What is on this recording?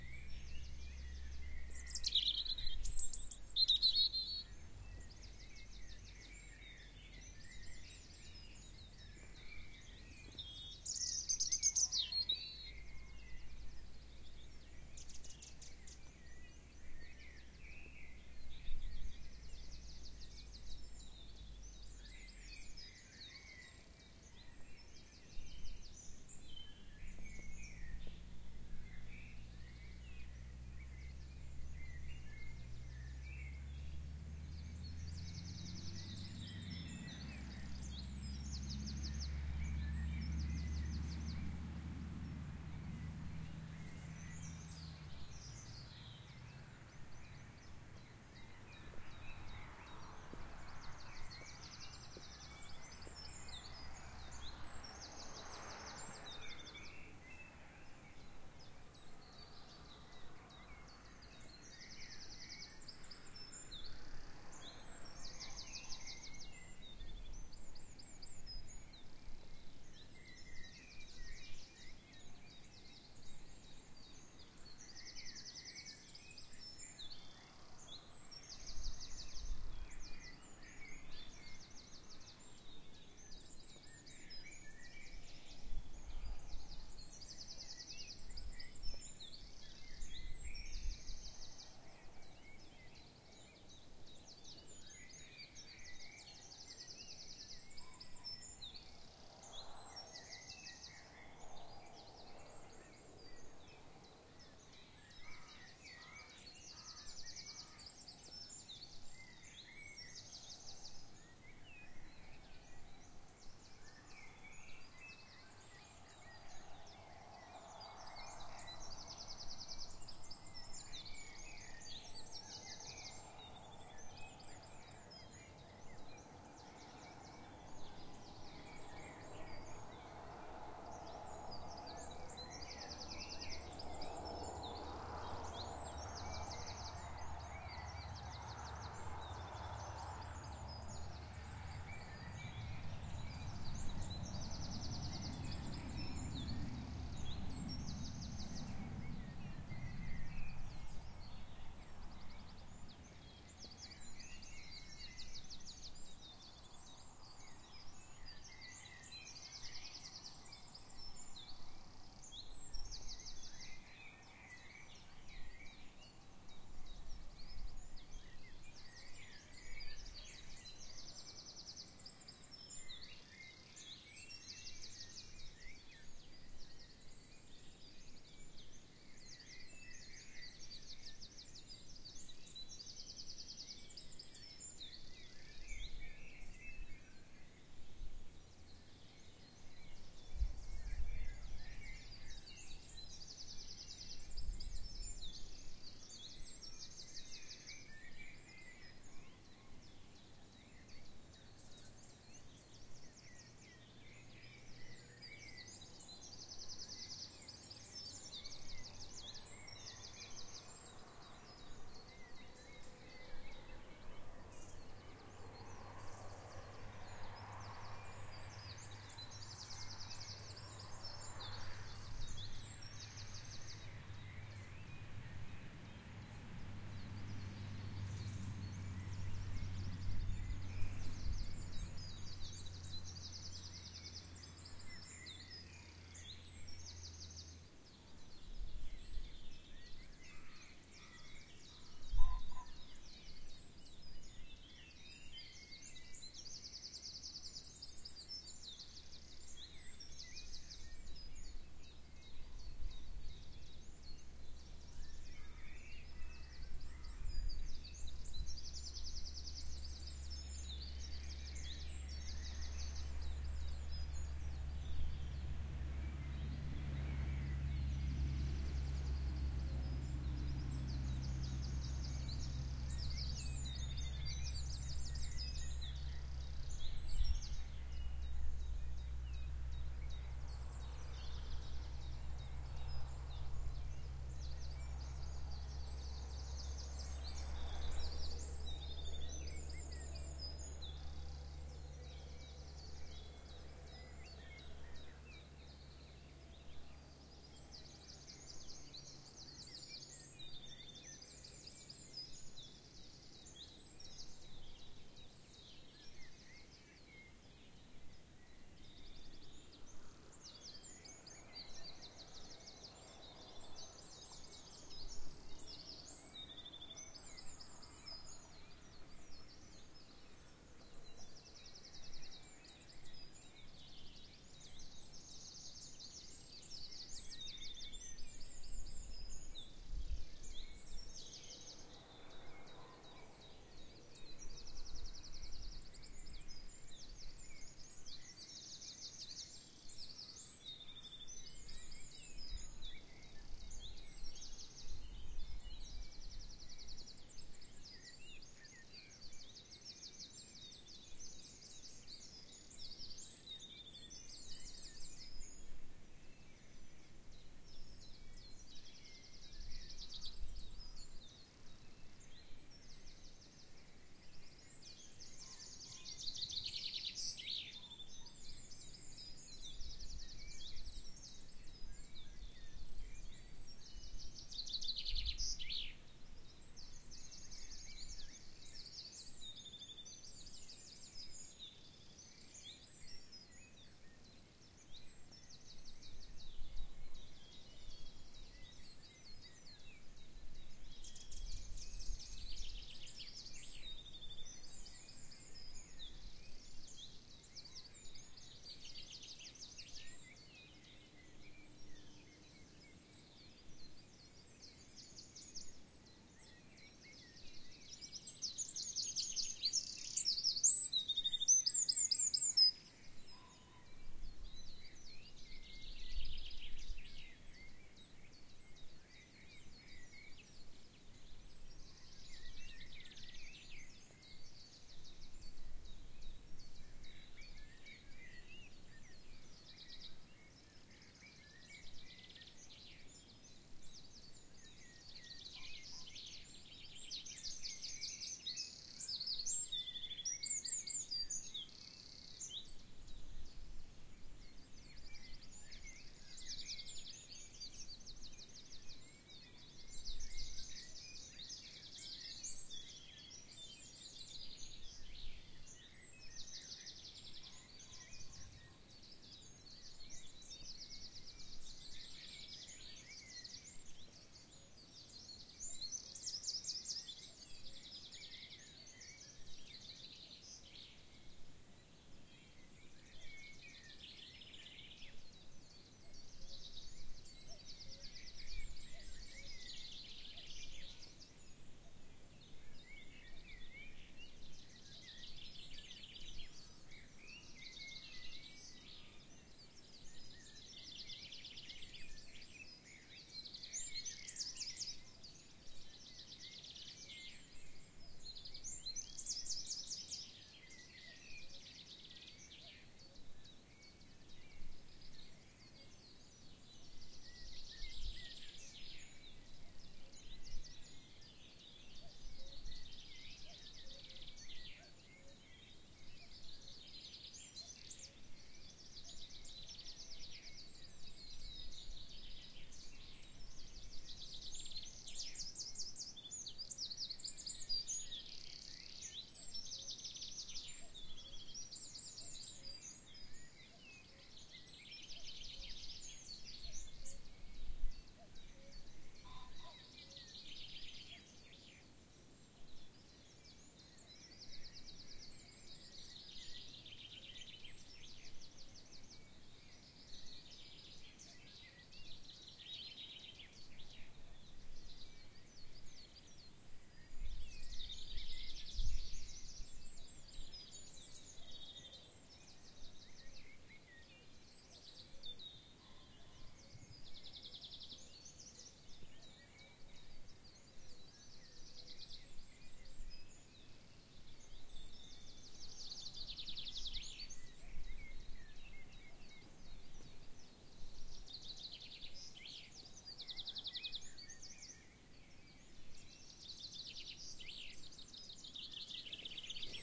Dawn chorus Ashdown Forrest Distact Cuckoo
Early (for me) morning recording of the spring dawn chorus over Ashdown Forest on the 30th April 2016 around 5 am.
bird-song, blackbird-song, Ashdown-forest, call, Chiffchaff-song, cuckoo, Dawn-chorus